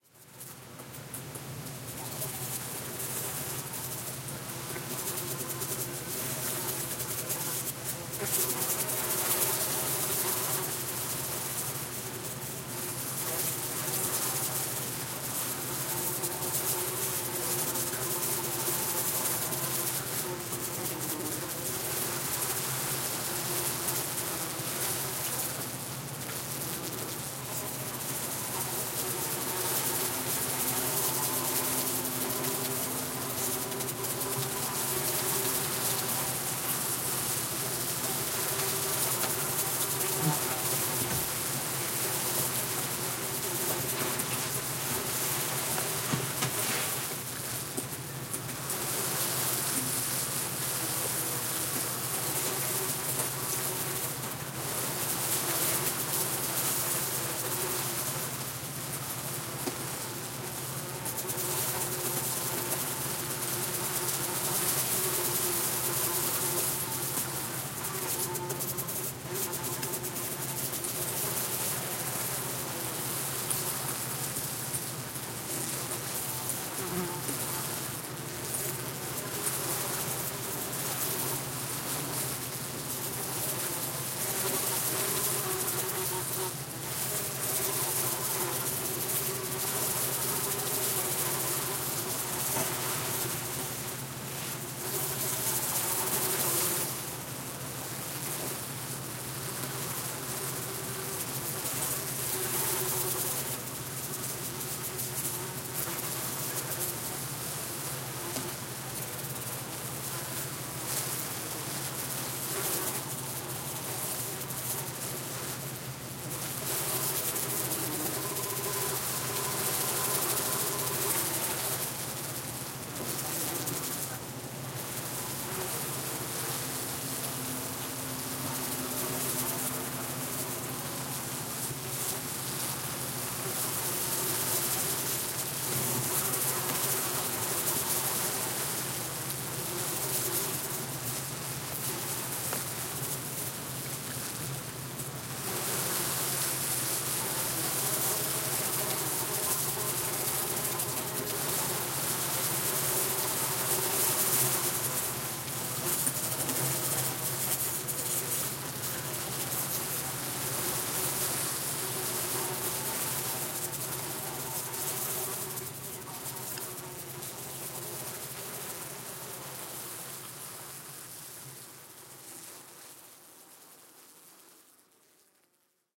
Large Swarm of Buzzing Flies
recorded on a Sony PCM D50
Buzzing, Flies, Large, Swarm